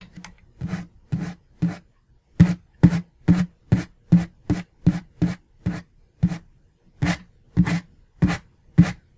Sweeping fingers actors a wooden table recorded this with USB Blue Snowflake Microphone